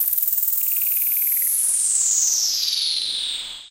TR-77 vintage drum machine hi hats processed in Camel Audio Alchemy.